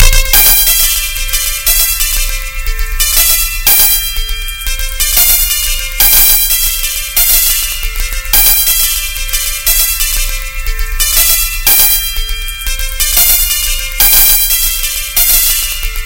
broken music
This was made using pinkyfinger's piano notes, arranged into music and highly edited in lexis audio editor.
Ambiance, Broken